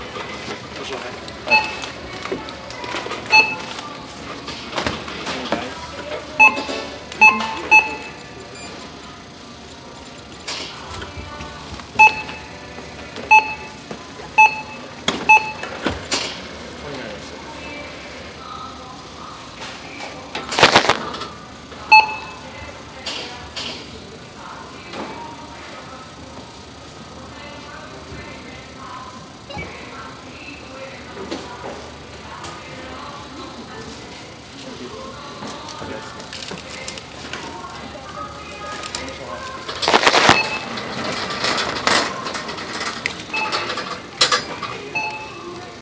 Grocery store cash register
Sounds at a grocery store checkout complete with beeps and some music in the background. The cashier first greets the customer with a "Bonjour/Hi" a typical bilingual greeting in Quebec. The sounds near the end are the customer (me) pushing the cart away from the cash with the next client's beeps heard a little more faintly.
Recorded on a Samsung Galaxy S3
shop, grocery